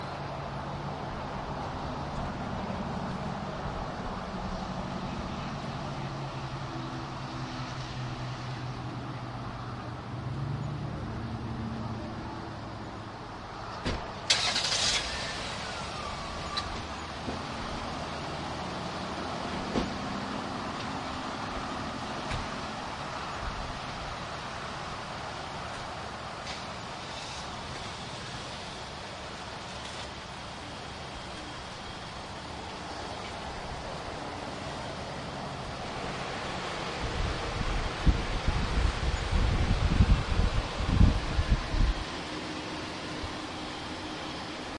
georgia visitorcenter
Georiga Visitor Center recorded with DS-40 and edited in Wavosaur.
field-recording, road-trip